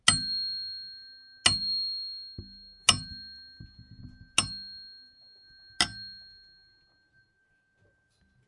Mysounds LG-FR Iris-diapason
Sounds recording from Rennes
CityRings, France, Rennes